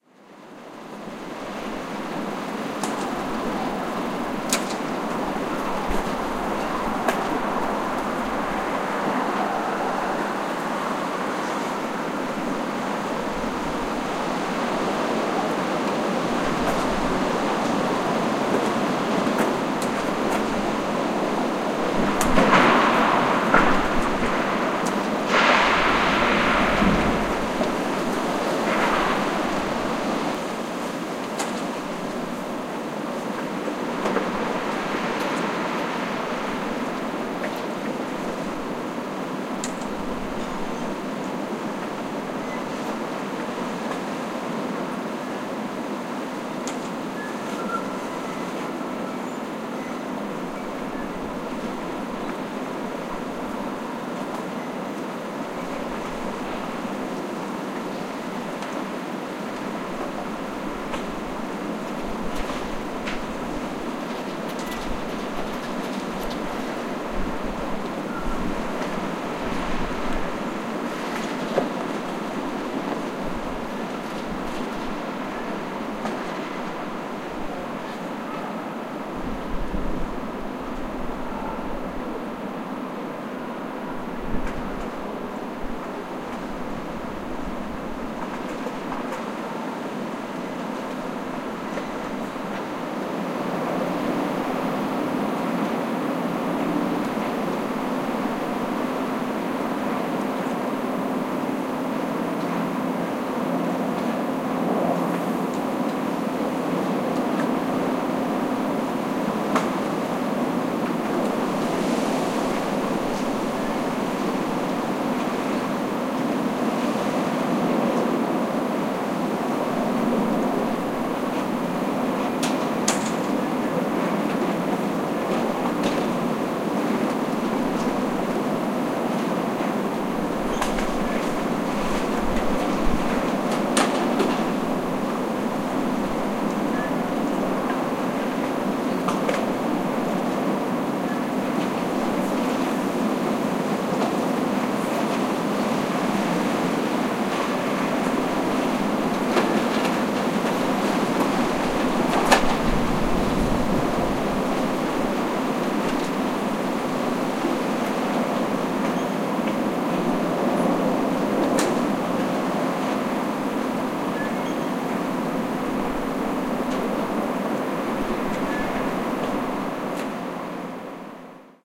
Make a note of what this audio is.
HURRICANE 172 AND BWAY 103012
From 4th-story window overlooking Broadway in Manhattan.
Hurricane Sandy, early morning hours of 10/30/12.
Sounds of sustained wind, gusts, blowing garbage, banging roll-down gates, flapping (torn) awnings, blowing leaves, debris, etc.
field-recording, New-York-City, Hurricane-Sandy, wind, NYC